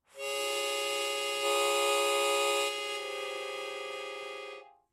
A chromatic harmonica recorded in mono with my AKG C214 on my stairs.